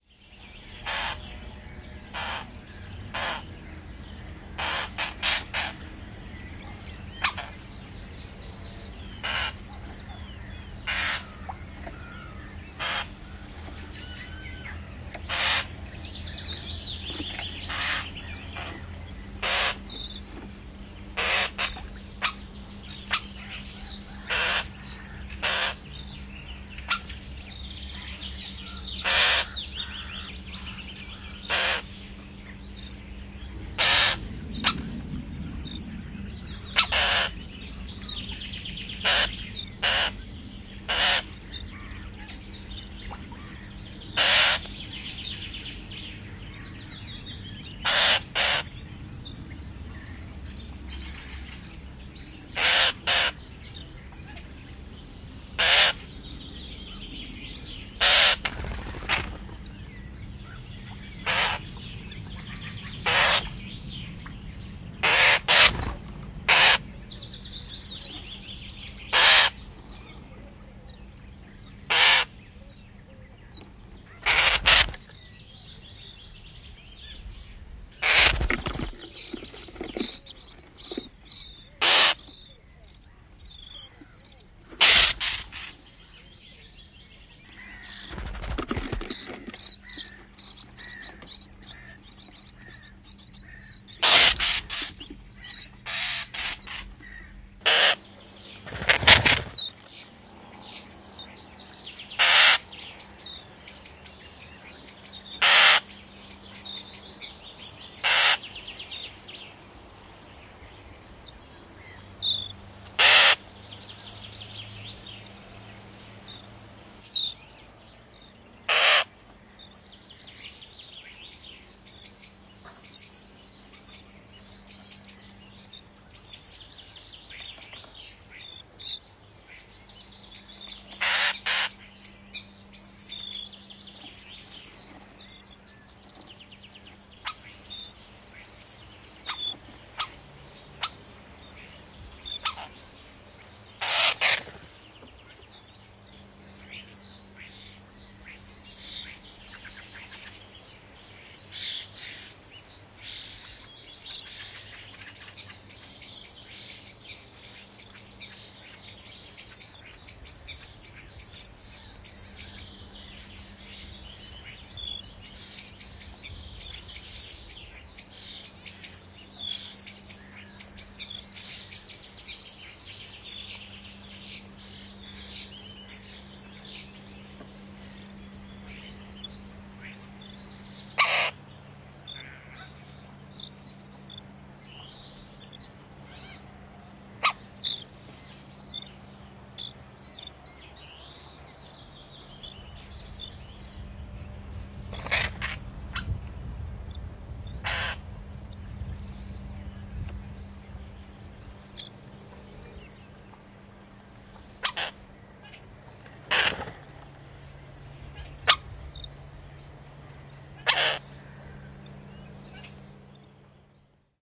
Kottarainen, pesä, pönttö / Starling warning at the nesting box, birdhouse, wings, nails hitting the box, nestlings chirping faintly, other birds and distant traffic (Sturnus vulgaris)
Kottarainen ääntelee, varoittaa pesällä, siivet, pyrähdyksiä, kynnet osuvat pönttöön, poikasten ääniä. Lähiääni. Taustalla muita lintuja ja kaukaista liikennettä. (Sturnus vulgaris).
Paikka/Place: Suomi / Finland / Vihti
Aika/Date: 10.06.1968
Bird, Birdhouse, Birds, Feed, Field-Recording, Finland, Finnish-Broadcasting-Company, Kottarainen, Linnut, Lintu, Nest-box, Ruokinta, Ruokkia, Soundfx, Starling, Suomi, Tehosteet, Varoitus, Warn, Warning, Yle, Yleisradio